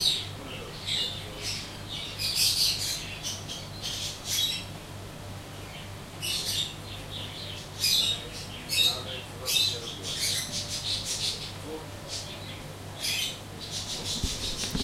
This is a small pet shop with parrots and another pets. It was recorded with Zoom H2N in the afternoon.
buying, pet-shop, birds, animales, pets, loros, animals, mascotas, screaming, parrots, pajaros, singing